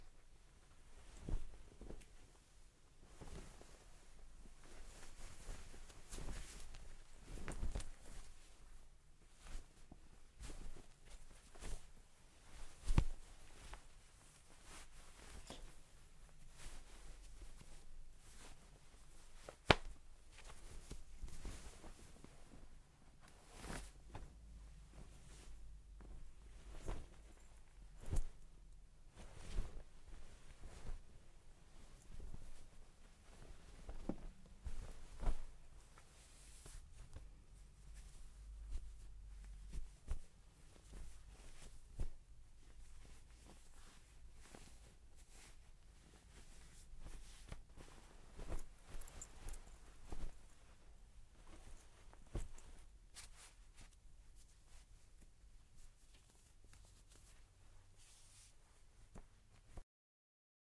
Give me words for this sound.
Putting a jacket on and taking it off. Clothing movement sounds.
clothing, jacket, rustling, taking-jacket-off